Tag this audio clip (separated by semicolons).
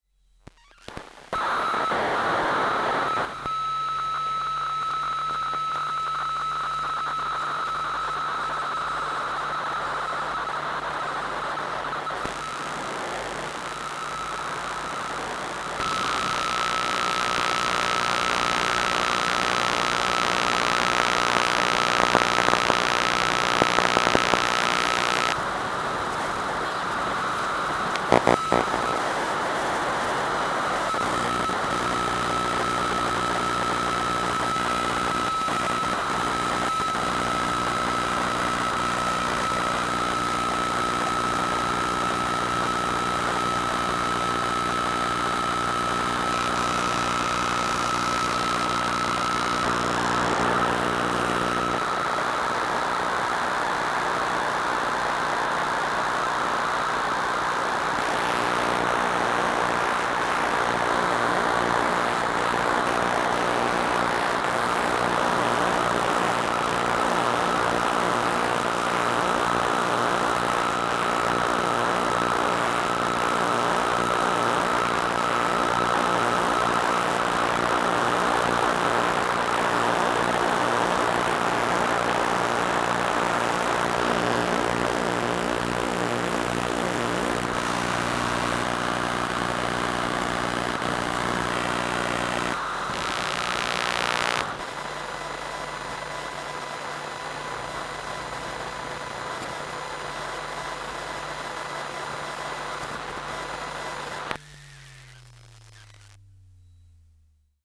electromagnetic; abstract; soundscape; field-recording; noise